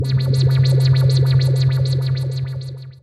KOUDSI Linda 2015 2016 strangemachine
*You hear the distant sound of a machine and try to avoid it, maybe it can harm you... or it can make you disapear. You forgot to bring weapons with you and all you can find on this planet are silver colored stones and sand… You have to play it safe.*
For this sound, I generate a tone with a sinusoidal waveform. I also applied effects (Wahwah, Fade out) and changed the speed and pitch. Everything was made on Audacity.
Description du son :
V’’ : Itération varié
1) Masse: groupe nodal c’est-à-dire plusieurs sons complexes.
2) Timbre harmonique: son riche en harmoniques, brillant
3) Grain: le son est lisse car le son de base est une fondamentale pure (sinusoïde).
4) Allure: il comporte un léger vibrato car il y a une modulation périodique du son, c’est-à-dire que la hauteur du son autour de sa tonalité varie.
5) Dynamique: l’attaque du son est brute, le son débute directement sans fondu d’ouverture.